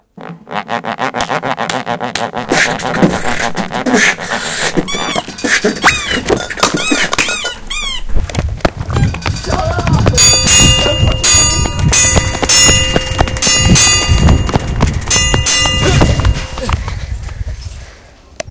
Soundscape made by pupils at the Ramon Berenguer school, Santa Coloma, Catalunya, Spain; with sounds recorded by pupils at Humpry David, UK; Mobi and Wispelberg, Belgium.